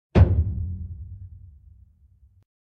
HUTCH HD SFX 2014 0144
Deep hollow metal impact, resonant.